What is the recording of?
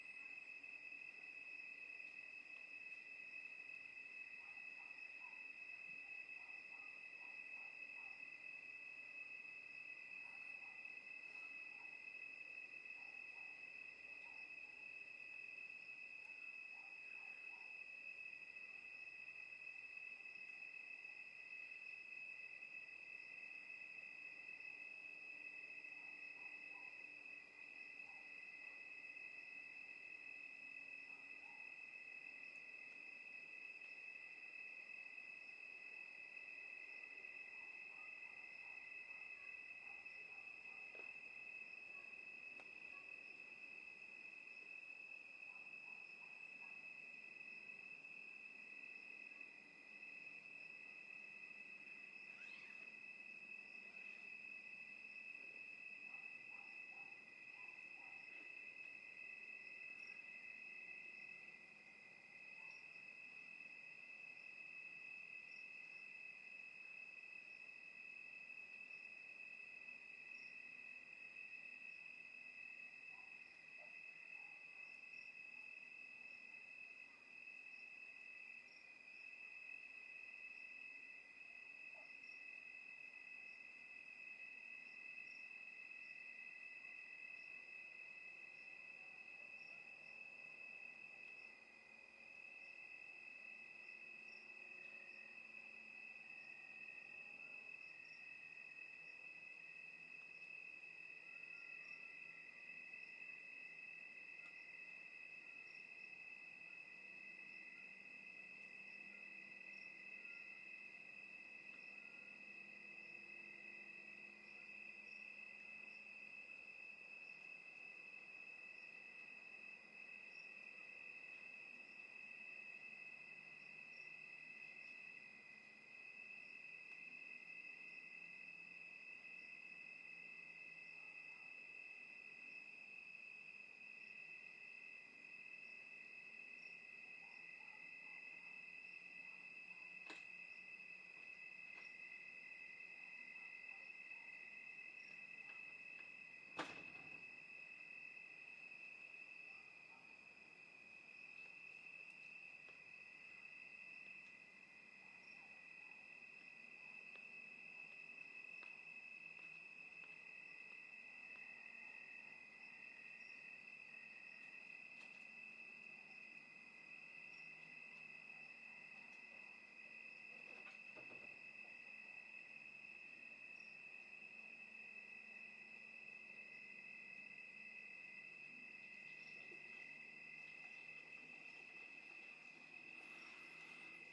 crickets night jungle or residential south america +very distant traffic
america, crickets, jungle, night, residential, south